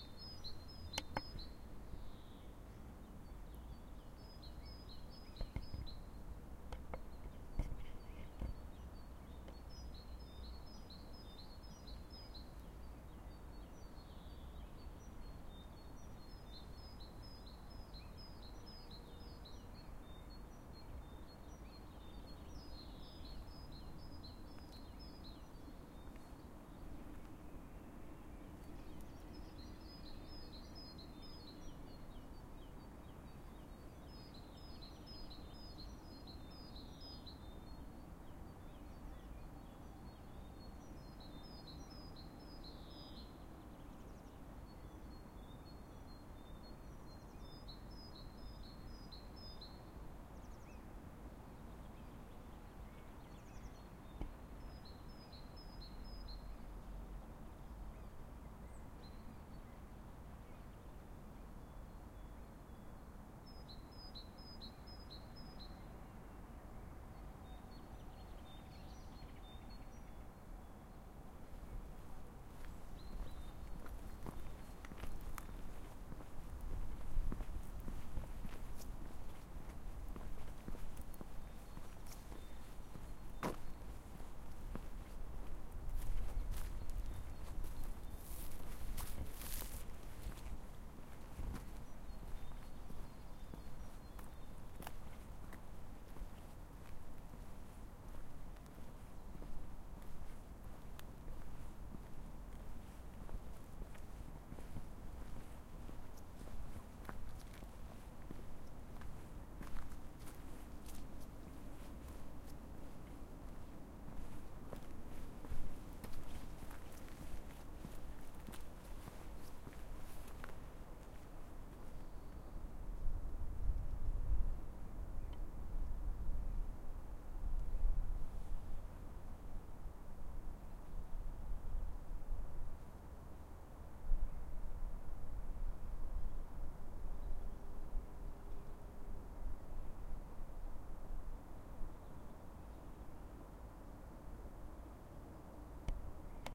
Park ambience. Birds singing, distant traffic. Spring.
spring-ambience, spring, park-ambience, birds, springbirds, park, ambience